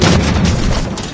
heavy crate smash
A heavier box/crate being smashed by impact. Uses a lot of splashzooka's wood samples.